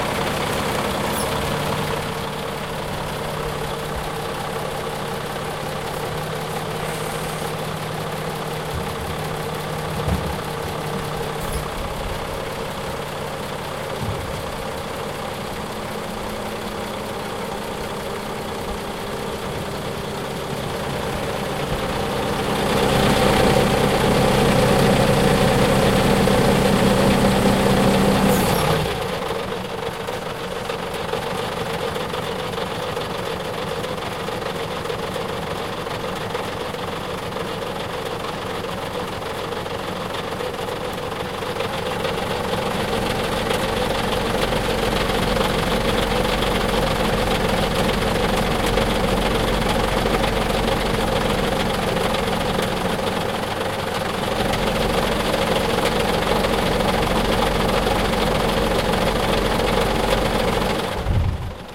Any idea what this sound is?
big lorry engine
lorry, diesel, idling, engine